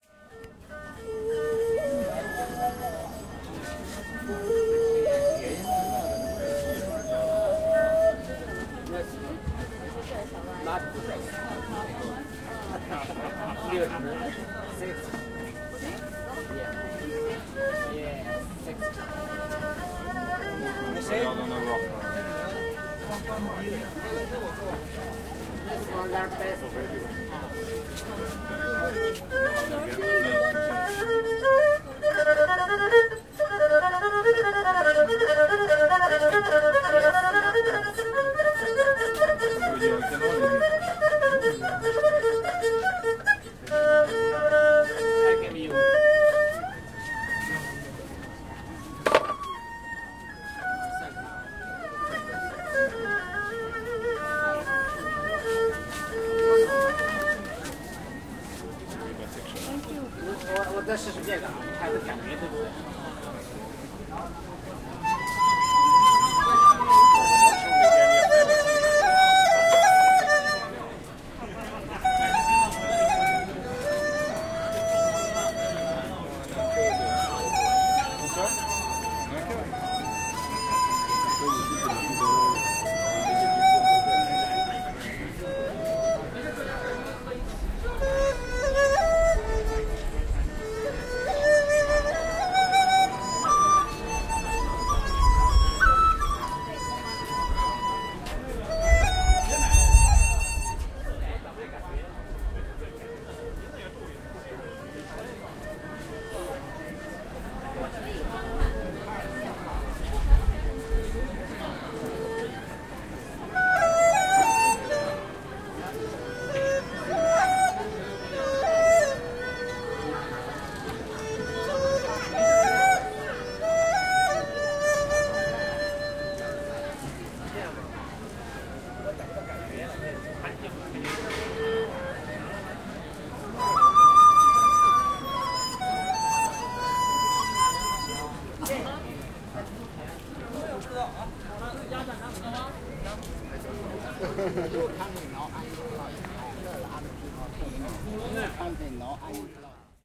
china market
a recording of a market in beijing. I am standing close to a man selling instruments.